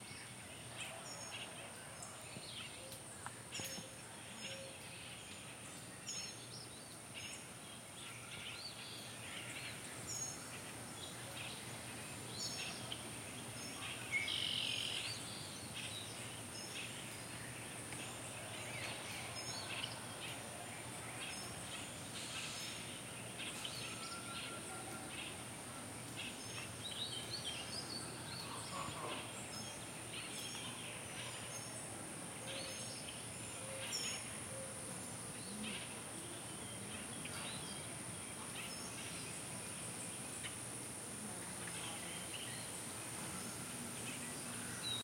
serenbe-spring-fields-ambiance
Summer at Serenbe Community in middle georgia, insects and birds at noon
insects, fields, ambience, nature, birds, serenbe, middle-georgia, georgia, spring